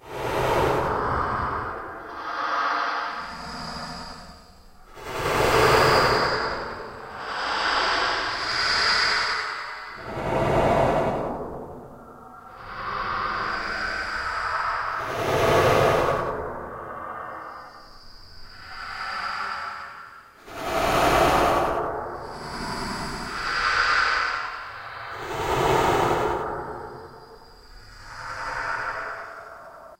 portal whisper
Just a mystery sound I've recorded and composed with Audacity. It would be good if you inform me when you use it in the comments section :)
ghost, horror, mystery, whisper, whispering